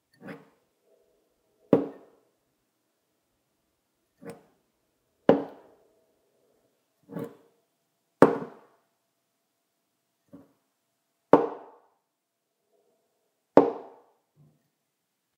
Glass cup pick up put down on wood table
picking up and putting down a glass on a wood table